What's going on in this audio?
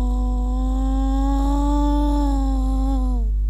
old navy glory
glory, navy, old